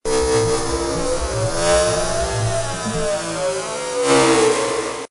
sound-design created from heavily processing a field-recording of water
recorded here in Halifax; rises and then falls in pitch; processed with
Adobe Audition
1-bar, ambient, electronic, field-recording, industrial, loop, pitched, processed, sound-design, stab, sustained, water